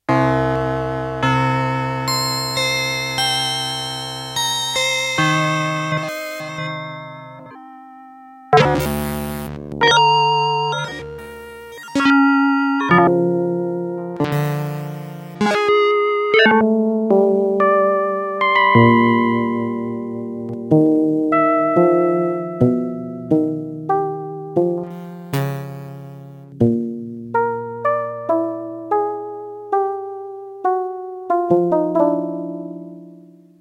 Yamaha PSS-370 - Sounds Row 4 - 01
Recordings of a Yamaha PSS-370 keyboard with built-in FM-synthesizer
Keyboard, PSS-370, Yamaha